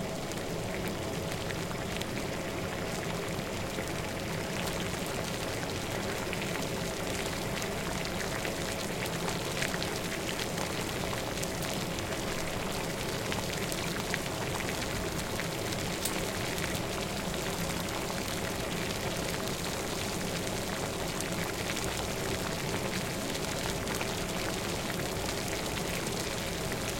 Water Boiling Strong
Boiling some water at strong-strength. Large bubbles emerging.
Cracking sounds from the oven also included. Easy to mix into a loop.
boil, boiling, bubbling, cooking, cracking, high, high-quality, hot, kitchen, oven, strong, warm, water